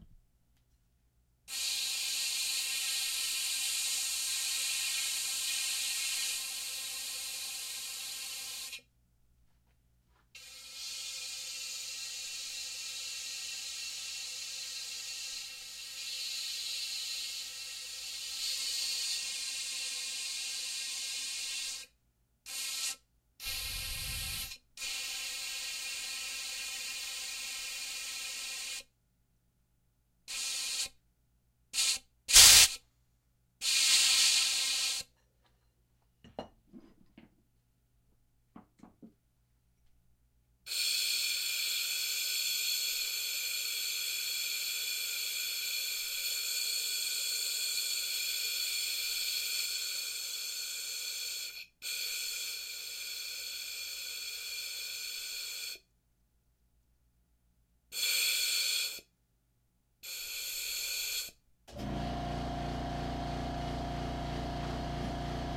steam pipe 1
i needed some steam pipe sounds could not find them so I made them up. h4n
air, fx, steam